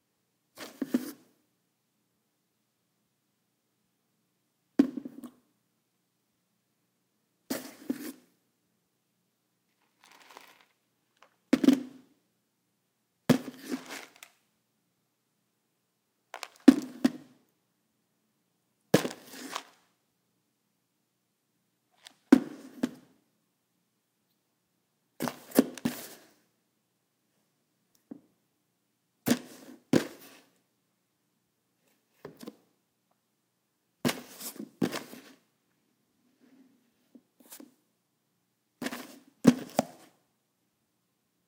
Tupperware with cereal, handling, open lid
opening and closing the lid of a large piece of plastic tupperware
cereal, cooking, handling, kitchen, lid, open, plastic, pop, top, tupperware